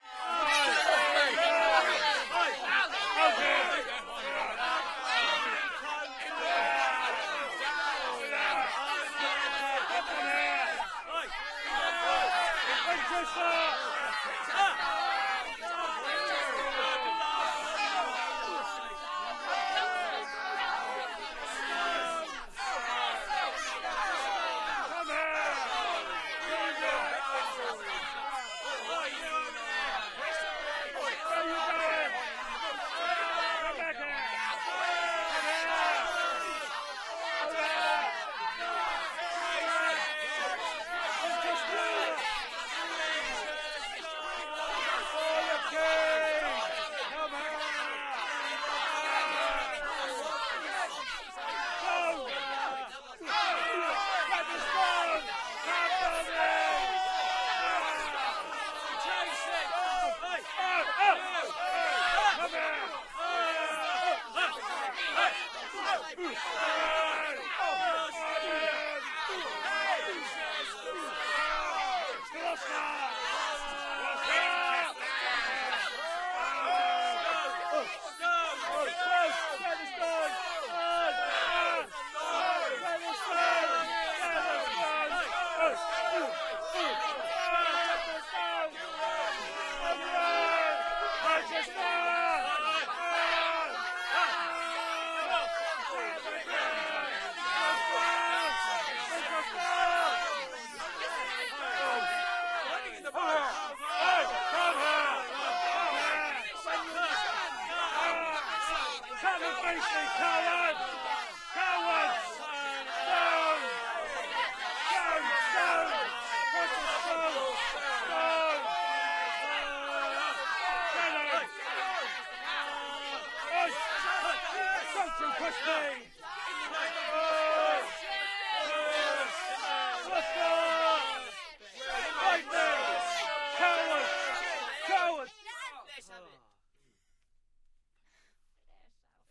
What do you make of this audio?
Crowd/Mob/Riot Noise (Voices Only) - 14 people, 2 minutes HENRY VI
A two minute section of angry mob/riot noise for a production of Henry VI Part 1, performed by Italia Conti 2nd year students in the Italia Conti sound studio.
Stereo pair recording of 14 voices (fairly even mixture of male/female) for 2 minutes, featuring shouting, grumbling, and general riot noise - with some specific references to the play (e.g. "Gloucester").
Raw, as recorded.
Don't mind where used, but would appreciate a comment as I'd be greatly interested as to what you used it for.
voices, chant, crowd, 1, angry, shakespeare, vi, noise, men, people, part, riot, stereo, women, henry, soundscape, mob, rioting, shouting